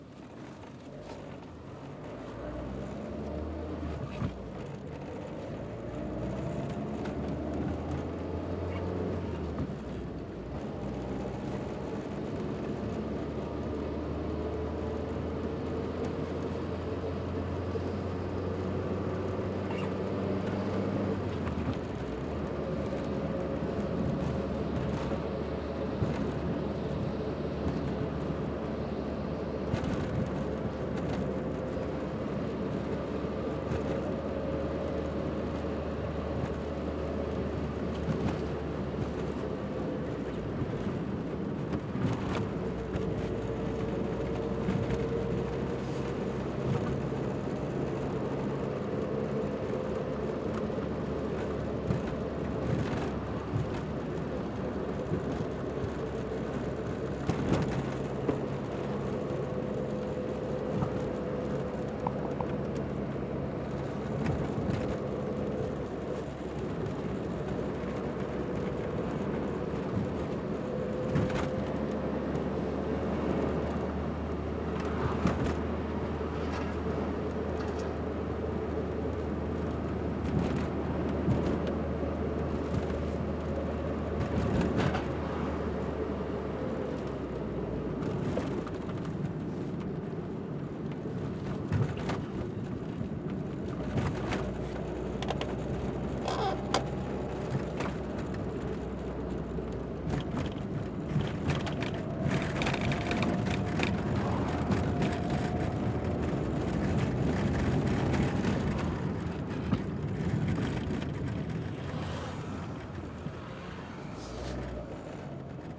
Ambiente - interior de vehiculo
inside a car in a raining day
MONO reccorded with Sennheiser 416